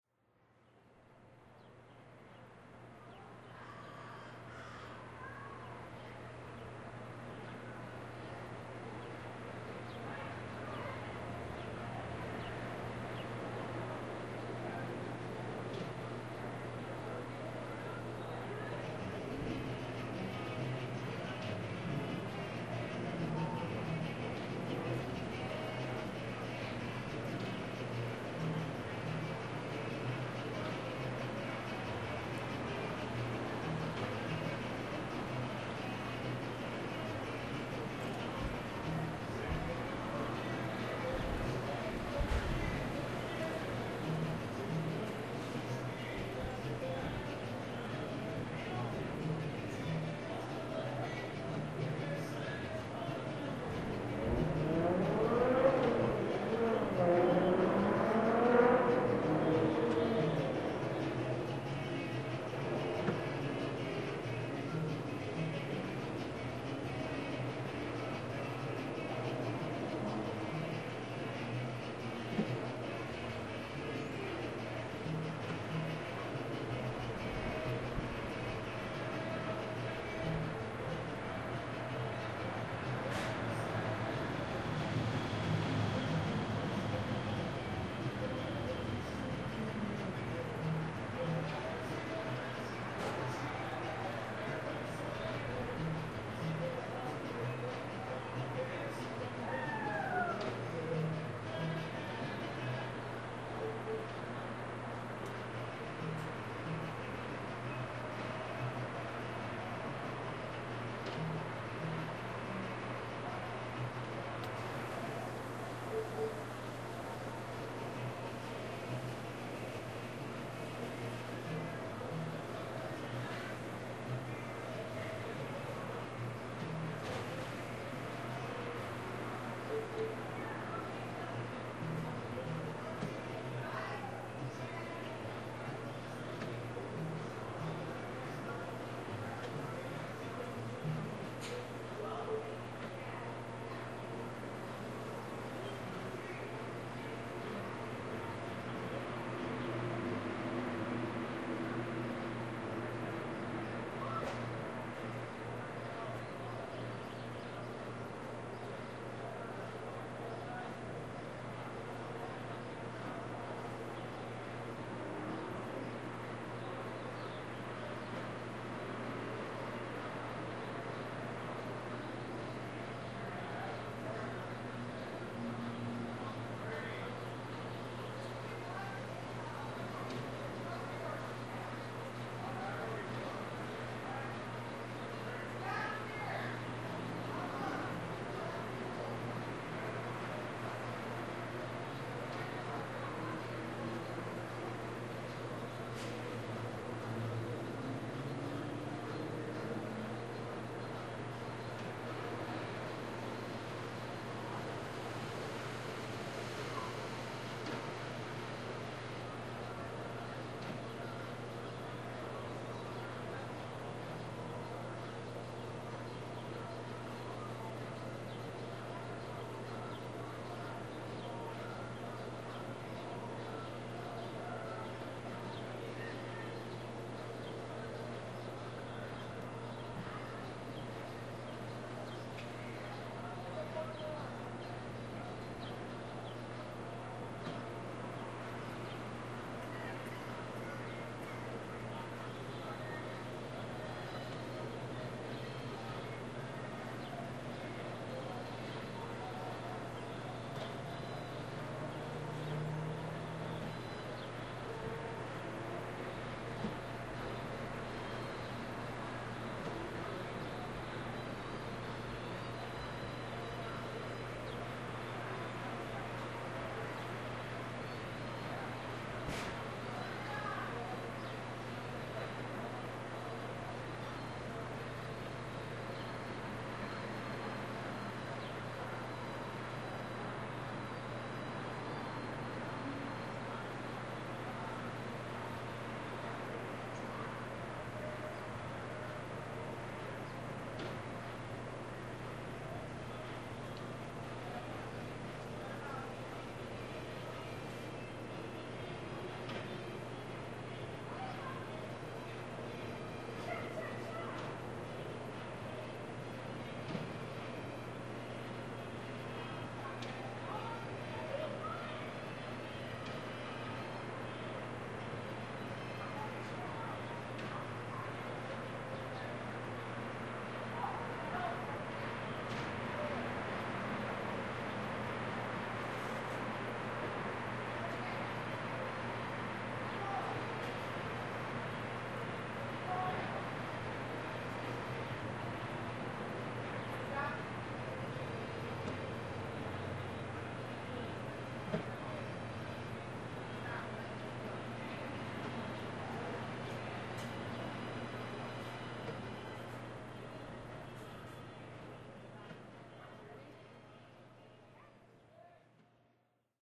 Cinco de Mayo urban cookout
a Cinco de Mayo celebration and cookout in an urban neighborhood